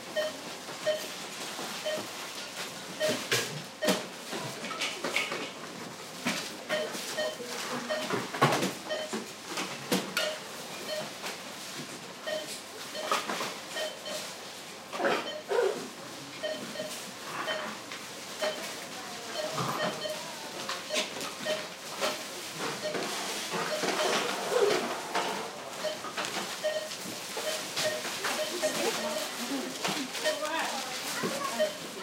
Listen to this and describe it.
Beeps barcode reader 2
Beeps from several barcode readers in a supermarket which create a delay audio effect. Sound recorded in Milton Keynes (UK) with the Mini Capsule Microphone attached to an iPhone.
ambience, beeps, barcodes, supermarket, field-recording